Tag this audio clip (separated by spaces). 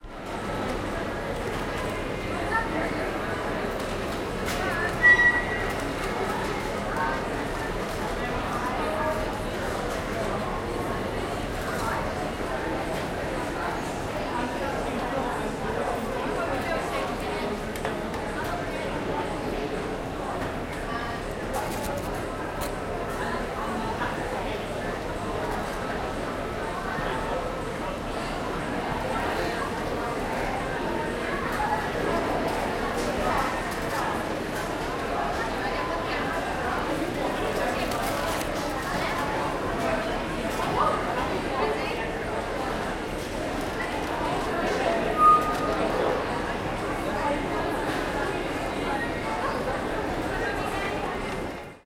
shopping mall ambience centre ambiance store court shop food